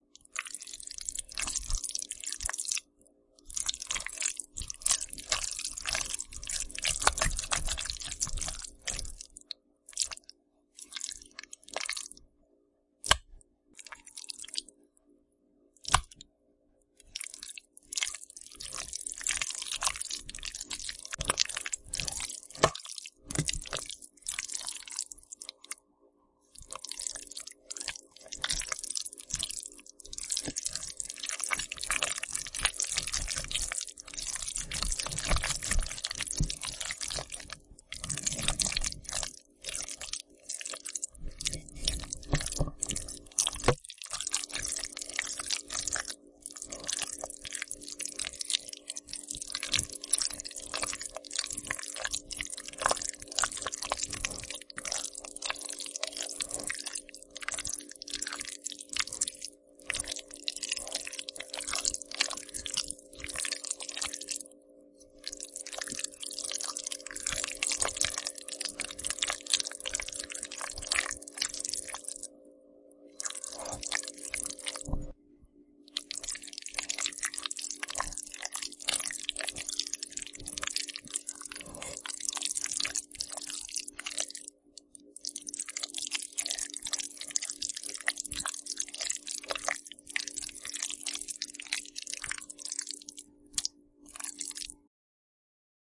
Good minute and a half long sound bed for zombies feeding or other gross sounds. Squishing...sooooo much squishing!
Sound created by stirring a big bowl of rice and beans. Recorded with a Zoom H6 (MSH-6 mid-side stereo capsule attached). Clean, gross sound for whatever muck-ish sounds you need. (What's mine is yours!)
gross, gurgle, guts, halloween, monster, squish, squishing, zombie